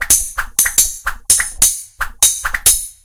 sword battle loop
medieval, soldier, fighting, knight, blade, slash, fight